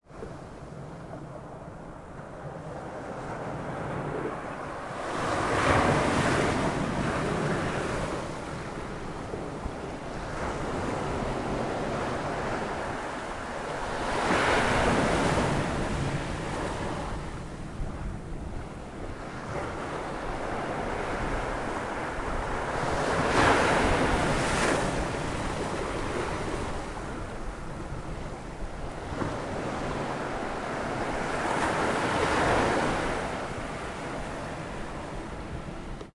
Coloane HacsaBeach splash stones 2 short
Hacsa Beach Coloane Macau